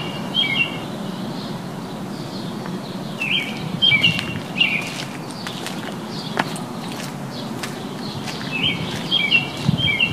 Crescent Park Robin1
Some chirps from a robin sitting on the table next to me at the Crescent Park Carousel in Riverside RI, USA